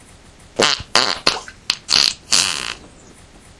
quack quack fart
fart poot gas flatulence flatulation explosion noise weird space
fart, noise, gas, explosion, weird, space, flatulence, flatulation, poot